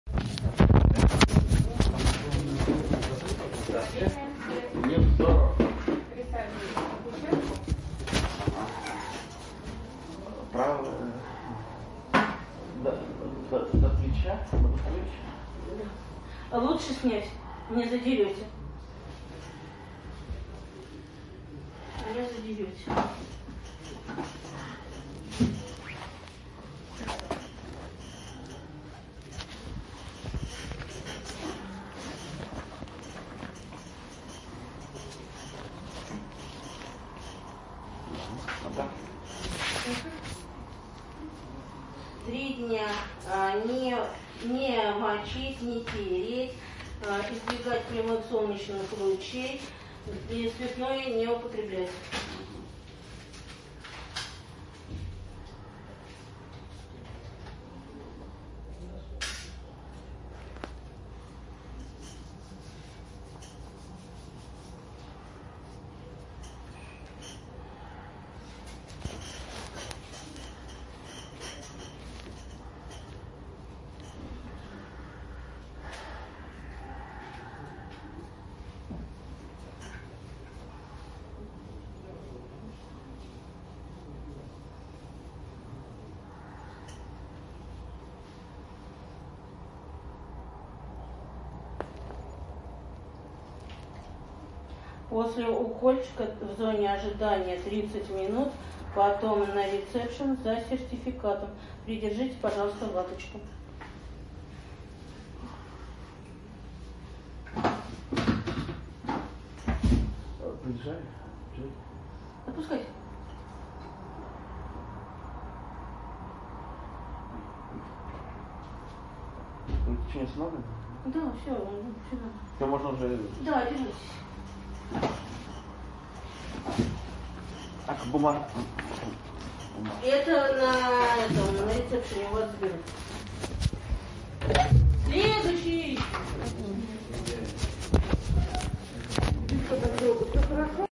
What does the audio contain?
Vaccination process sound in Russian Sputnik V covivak inoculation
пандемия эпидемия коронавирус ковид ковид19 ковидники вакцинация ковидиоты укол прививка антипрививочники
spuntik, coronovirus, pandemic